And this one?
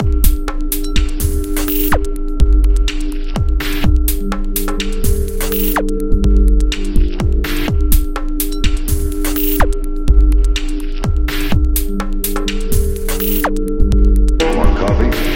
More Coffee 125bpm
Techno beat with voice sample. 8 Bars. This one is downright hypnotic when looped!
Coffee, Minimal, Techno, Chill, Loop